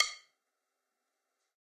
Sticks of God 009
drum, drumkit, god, real, stick